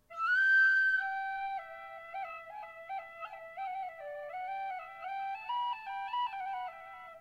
irish folk riff
Folk riff played by me, mixed with Audacity